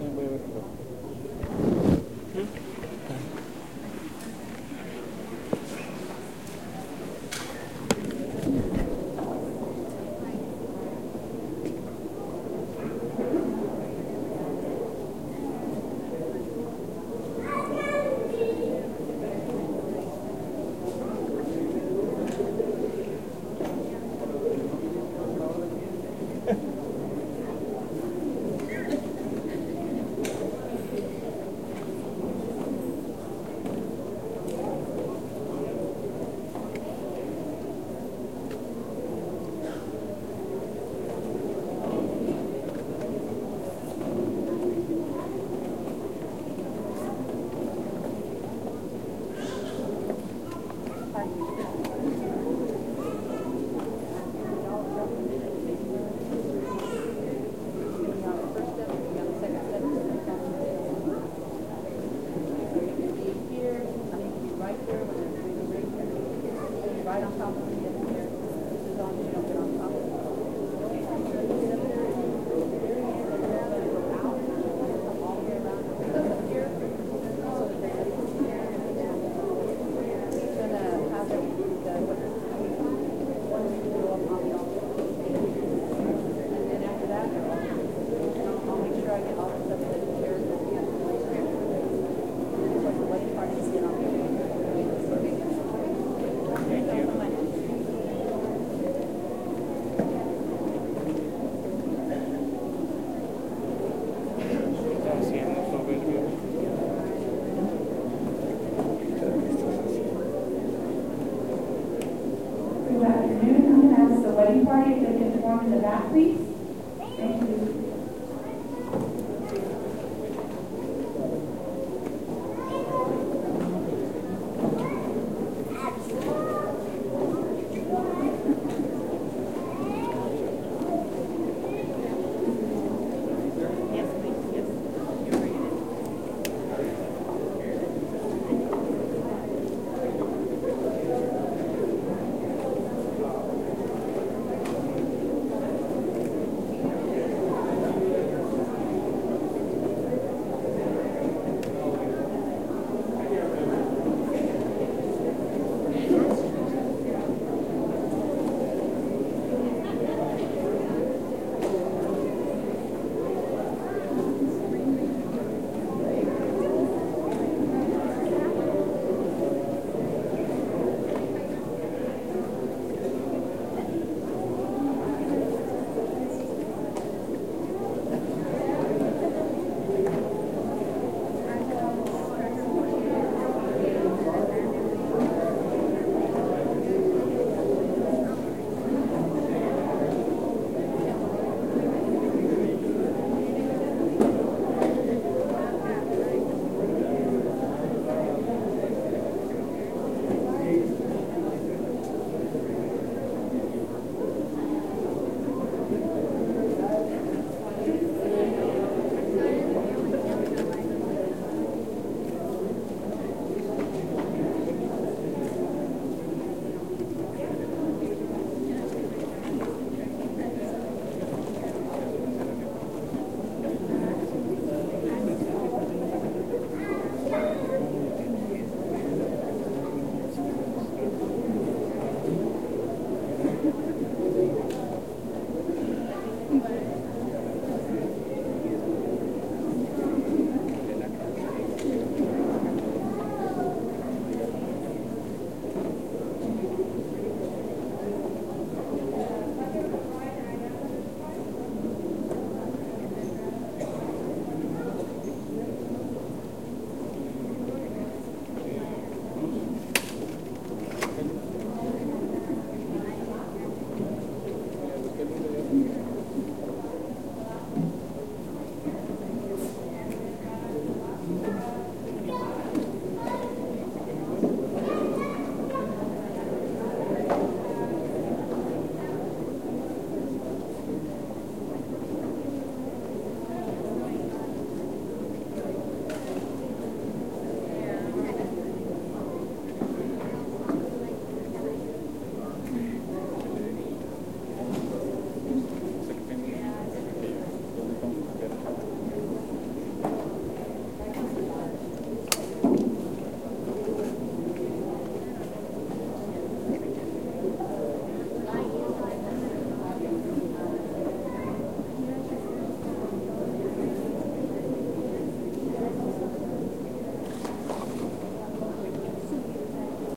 people in a church
This audio was recorded in a church of Texas, before a wedding started. The people might talk in english and spanish at times. Recorded with a ZOOMH4N.
church, people